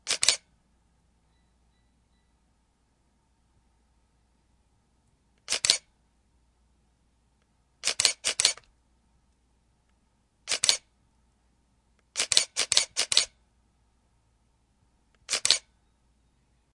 This is the sound of a shutter of a camera at 1/20th of a second.